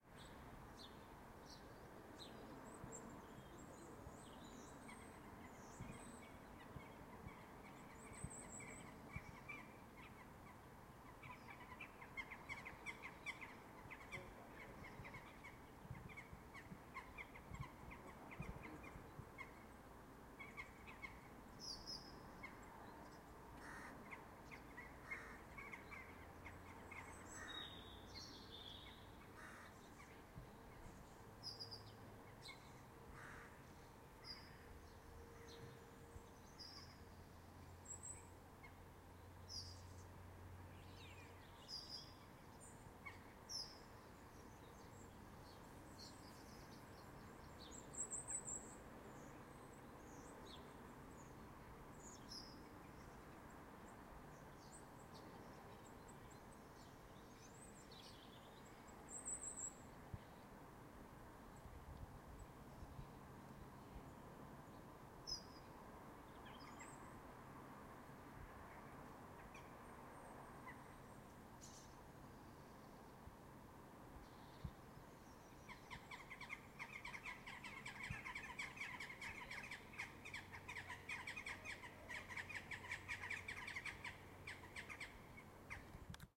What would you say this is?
Birds and Crows
morning birds with crows
birds, crow, crows